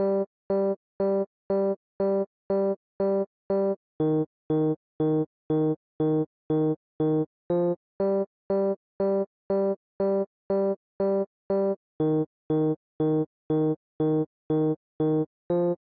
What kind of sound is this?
Retro Music 01b
Made with beepbox!
synth, Retro